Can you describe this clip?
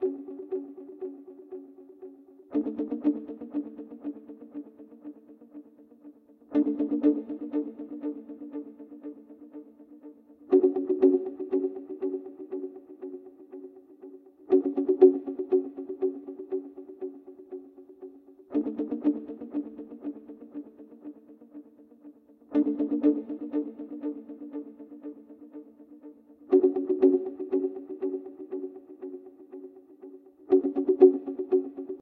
Charvel Guitar ran thru Axe-FX synth patch. Recorded @ 120BPM